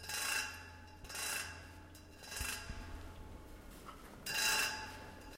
session 3 LBFR Mardoché & Melvin [3]
Here are the recordings after a hunting sounds made in all the school. Trying to find the source of the sound, the place where it was recorded...
sonicsnaps, france, rennes